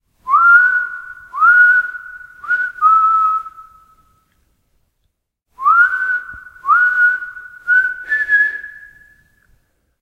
Invented whistle sequence for an atmospheric wild west duel scene in Super Sun Showdown. Recorded with Zoom H2. Edited with Audacity.